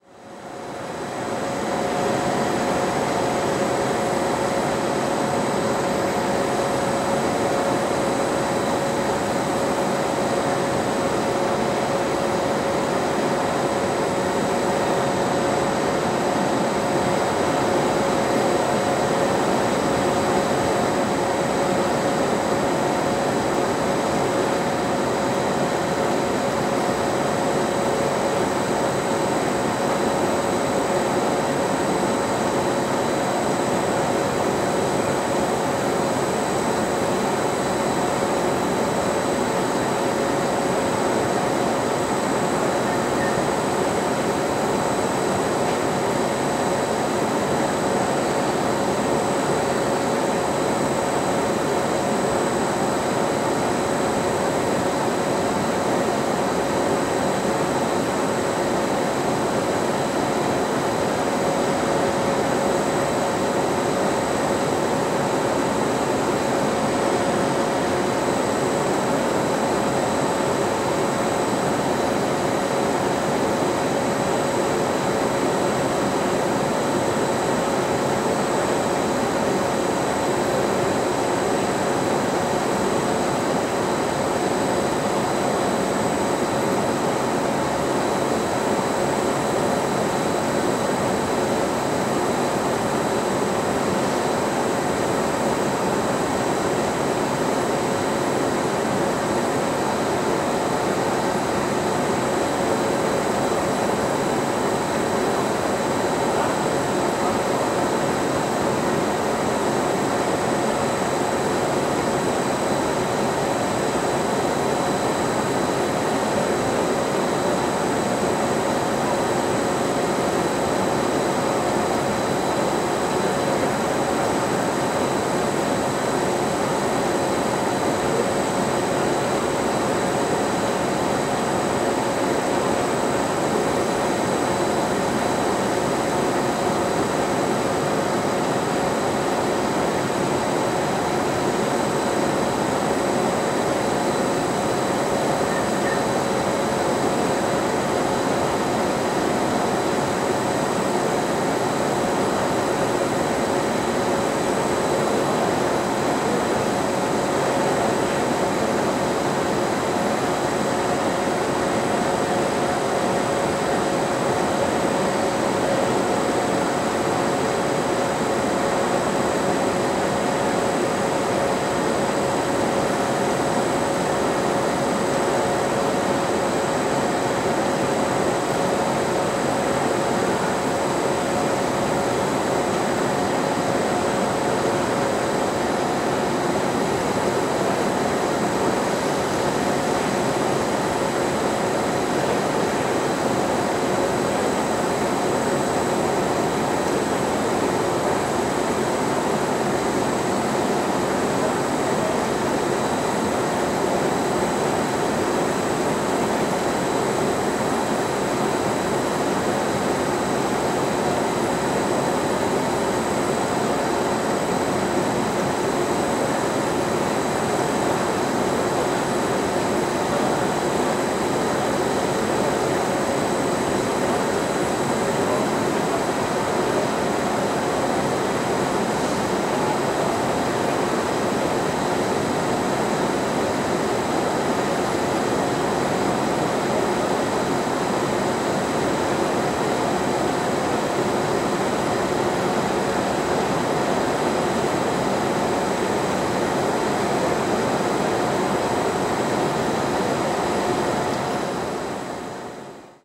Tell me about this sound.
Factory machine 03: mono sound, registered with microphone Sennheiser ME66 and recorder Tascam HD-P2. Brazil, june, 2013. Useful like FX or background.